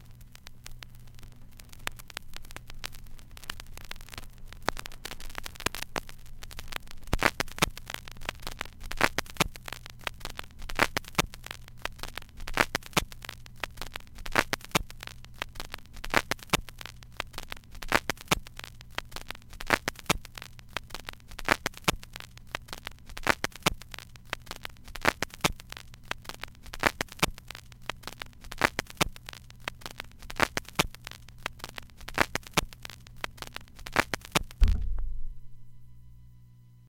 endoftherecord kr
Snippets of digitized vinyl records recorded via USB. Those with IR in the names are or contain impulse response. Some may need editing or may not if you are experimenting. Some are looped some are not. All are taken from unofficial vintage vinyl at least as old as the early 1980's and beyond.
surface-noise, record, LP, turntable, vinyl